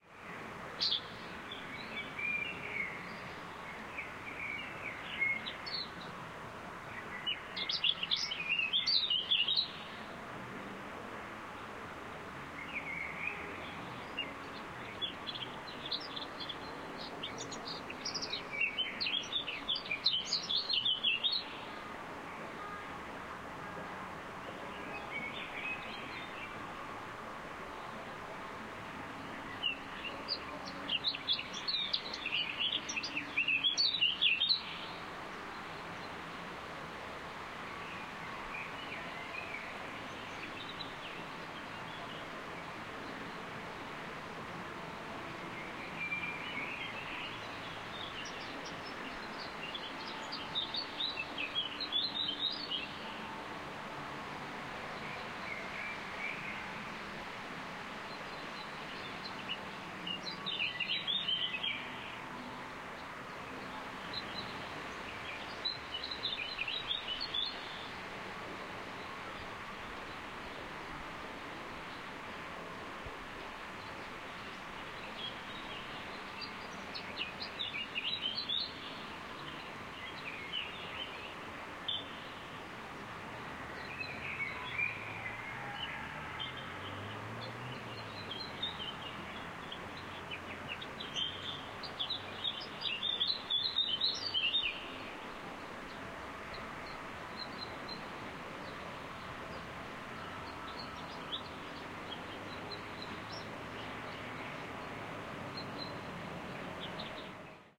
Atmo - Fechenheimer Ufer im Mai
..it's June. Maybe on the other side of the river, outside of the citie's center, we can find some place, where we're safe from the traffic noise and the irritation of everyday's business? We can hear the birds a little clearer, but half a mile away there's some funfair in process.
Recorded in stereo, live and on location in Offenbach am Main.
Atmo, city-border, field-recording, funfair, nature, picknick, riverside, rural, Stadtatmo